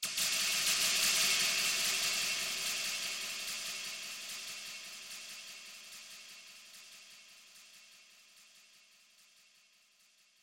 metallic rattle reverb
rattle, metalic